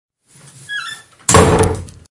This is a simple door slam from my room and recording by myself, its a useful sound effect for some themes. Hope its helpful.